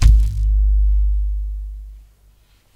Balloon Bass 13
Balloon Bass - Zoom H2
Balloon, Bass, sub